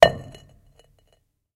stone on stone impact6
stone falls / beaten on stone